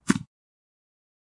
grass footstep hard 1
Footstep on grass recorded with Zoom Recorder